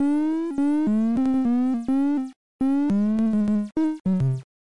Strange bass loop from space!